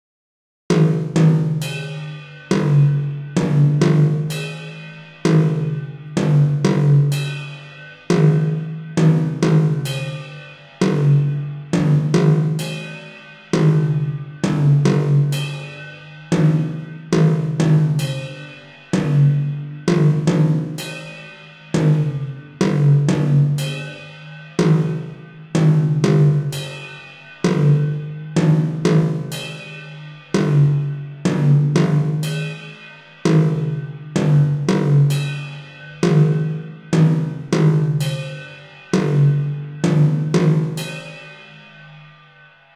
a psychedelic robotic song for backround music or whatever,
made with Magix Music Maker 2013 and Vita solo instruments